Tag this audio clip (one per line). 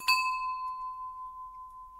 bell
deep
ethnic
japan
meditation
metal
percussion
temple